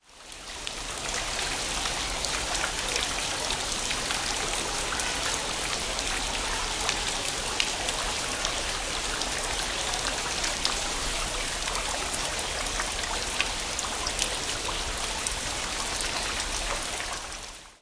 je waterdrips
Water falling in large drops into small pool indoors